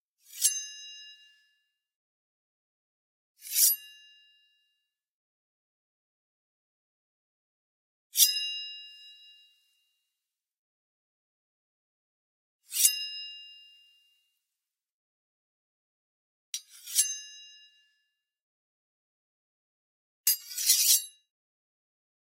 Mono recording of a student drawing a small knife or dagger. The classic shwing! SFX.
Recorded and performed by students of the Animation and Video Games career from the National School of Arts of Uruguay, generation 2021, during the Sound Design Workshop.
Oktava MK-12 with HC and LC Capsules
Zoom H4n
blade, sheath, knife, metal, shing, sword, draw, scrape, shwing, unsheath, medieval, dagger, ring, scabbard